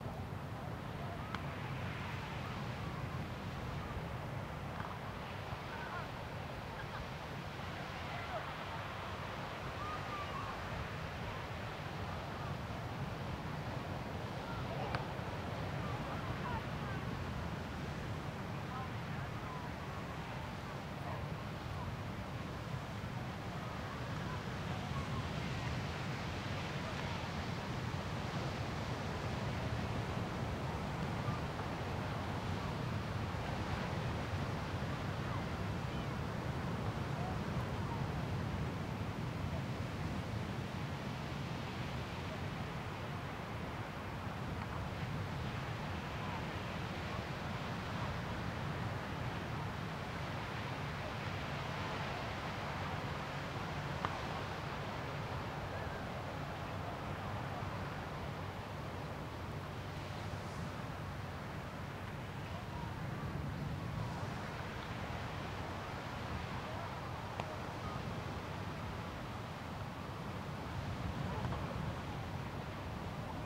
calm beach with volley game in background